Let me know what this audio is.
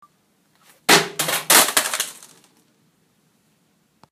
Laptop Drop 6
Laptop dropping on concrete.